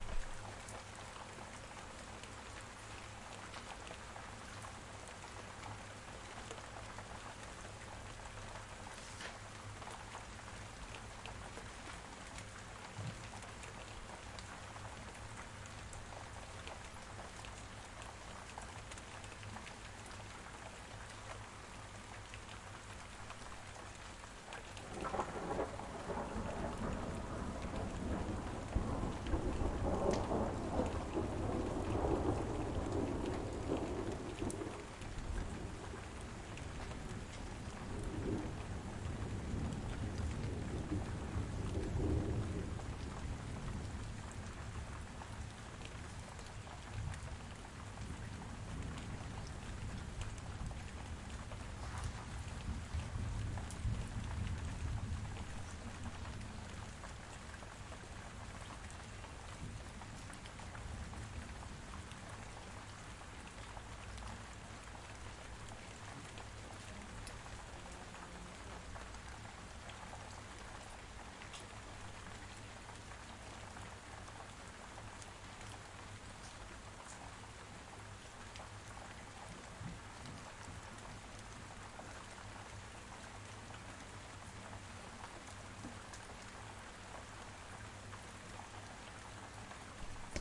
Rain and Thunder 6
field-recording, lightning, nature, rain, storm, thunder, thunder-storm, thunderstorm, weather